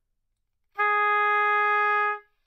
Part of the Good-sounds dataset of monophonic instrumental sounds.
instrument::oboe
note::G#
octave::4
midi note::56
good-sounds-id::7968
multisample, neumann-U87, oboe, Gsharp4, good-sounds, single-note